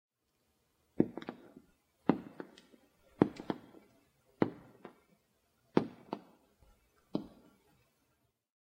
MUS152 high heels walking on hard floor 1 Thy Nguyen
MUS152 high heels walking on hard floor_1 Thy Nguyen
high-heels, walking